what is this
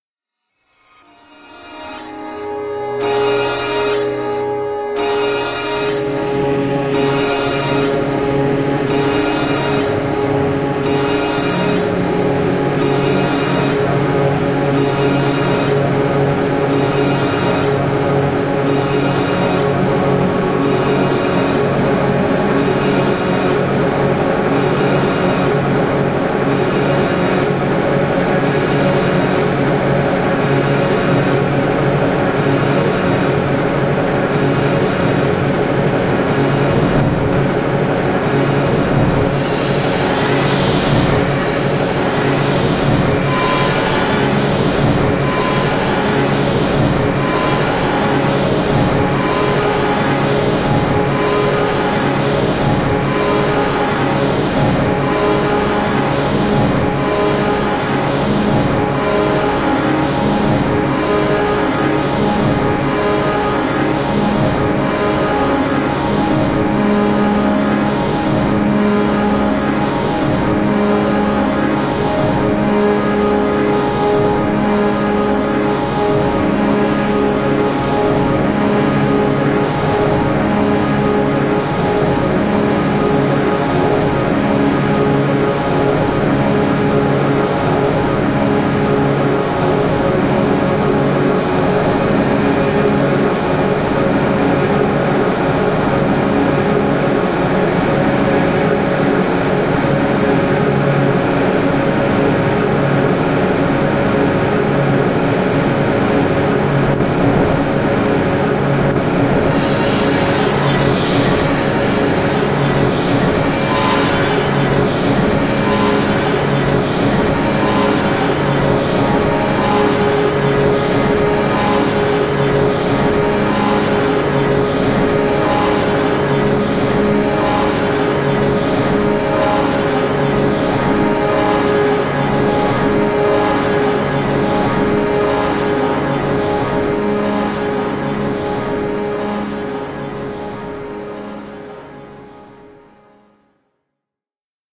Sound squeezed, stretched and granulated into abstract shapes
abstract, ambient, drone, granular, noise